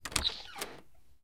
door - open 01
Opening a door.
door, door-open, open, opening, opening-door